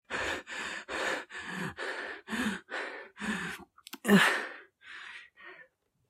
Tired breathing sound was used for my video: Curly Reads: The Eighth Floor.
Recored with a Iphone SE and edited in Audacity
2018, air-breathing, breath, breathing, callum, callum-hayler-magenis, callumhaylermagenis, curly-one, curlyone, gasp, gasping, heavy-breath, heavy-breathing, male-breath, male-breathing, male-gasping, male-heavy-breath, male-heavy-breathing, male-tired, male-voice, male-voice-tired, tired, tired-breath, tired-breathing, voice